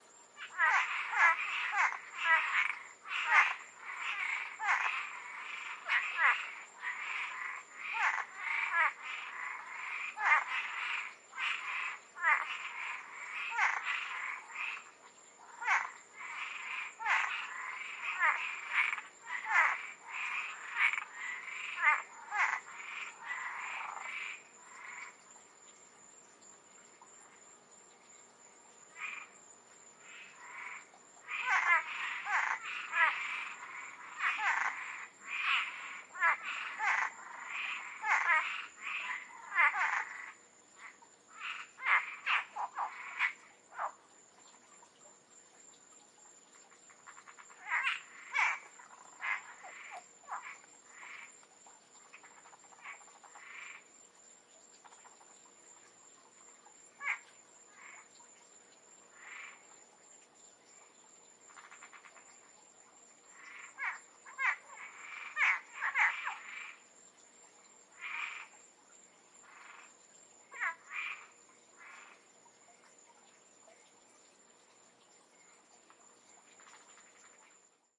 FL Keys Frogs
Predawn frogs in Koi pond with light insect background. Recorded in Marathon, Florida with a Zoom H1.
field-recording, frogs, insects, nature